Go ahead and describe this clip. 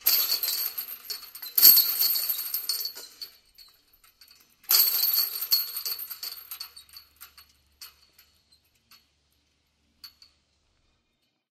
Rattling Chains 2
This is a recording of the chains of a disc golf hole being rattled.
chains, shaking, rattling